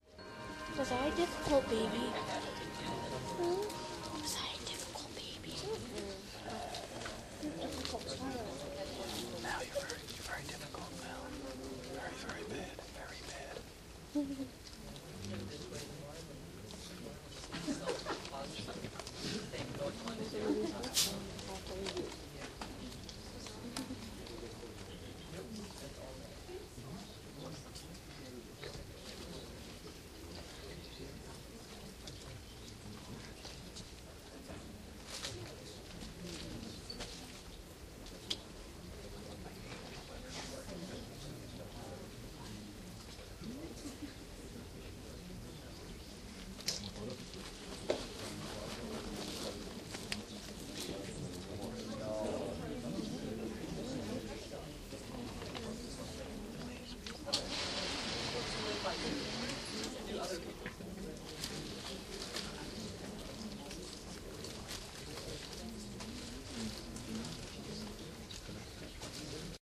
A trip to the movies recorded with DS-40 and edited with Wavosaur. Audience ambiance before the movie.